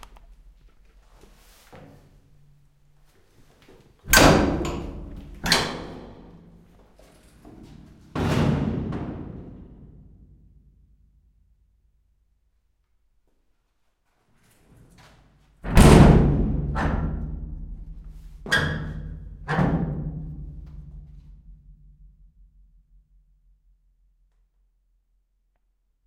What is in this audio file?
Heavy Gate Metal 02 (underground bunker of civil defense, Vsetin City, Club Vesmir)
Recorded on SONY PCM-D50 in underground bunker of civil defense. Vsetin City, Club Vesmir. Czech Republic.
Aleff
basement, bunker, close, closing, door, doors, gate, heavy, metal, open, opening, squeaky